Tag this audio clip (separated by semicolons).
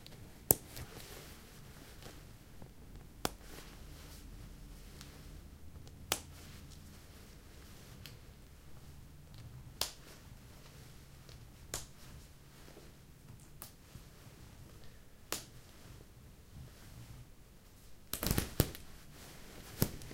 botton; click; clothing-and-accessories; snap-fasteners